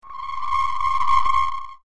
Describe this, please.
Auto breakes
For your racing game project!
Sounds has clear freq! Simply add reverb effects to create ambients!
Simulate and design sound in Sony SoundForge 11.
wheel, game-sound, auto-brakes, brakes, automobile